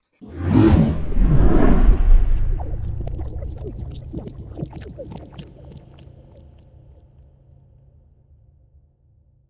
titleflight-bubbling-liquid-splatter
Two wooshes and a bubbling cauldron.
bubble, bubbling, carbonated, liquid, mush, potion, squish, titleflight, wide